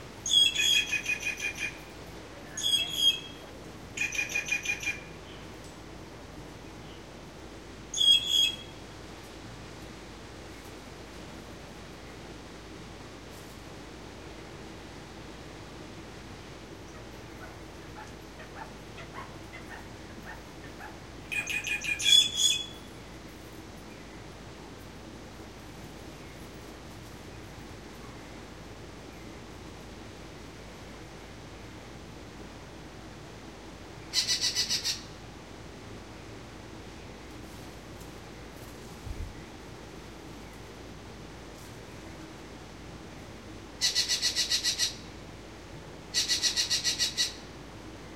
green jay02
Various calls from two Green Jays. Recorded with a Zoom H2.
aviary, bird, birds, exotic, field-recording, jay, tropical, zoo